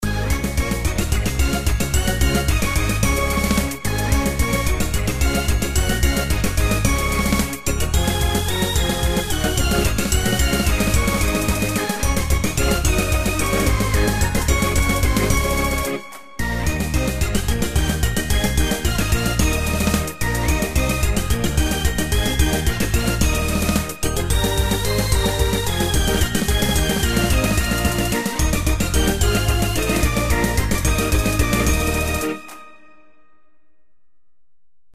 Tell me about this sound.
ADHD Wilhelmus 2x 0
i made a persiflage from our national folks song.